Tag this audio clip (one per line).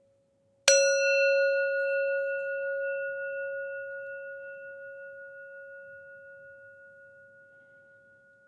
metallic
percussion
ring
metal
bell
gong